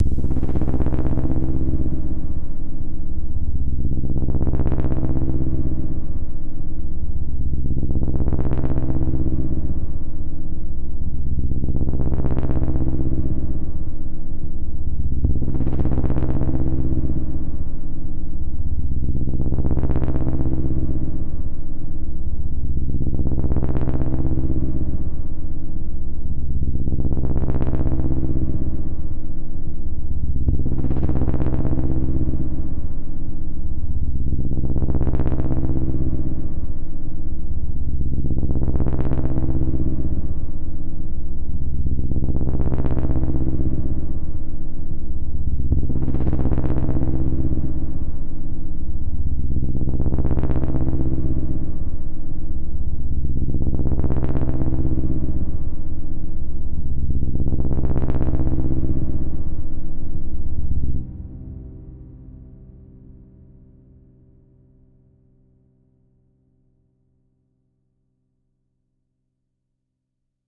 BRUNIER Lucas 2016 2017 bass
I used the vst Sylenth1 on FL Studio for this, i made a synthesizer with four differents oscillators. After i put a cutoff who cut highpass. Moreover, i put differents effects like distortion, delay, reverb or pitch.
Le bruitage est composé de 4 sons synthétiques. C’est un son cannelé continu avec une itération variée. Son timbre harmonique est terne et pesant.
Le grain parait rugueux, avec en fond un son lisse qui va et vient.
Il y’a une attaque qui revient en boucle puis les fréquences aiguës sont sinusoïdalement plus ou moins forte.
Les variations de hauteurs sont dû au calibrage, il y’a un filtrage des fréquences permettant au son de faire des variations serpentines.
ambient, bass, darkness, fear, loop, synth, tension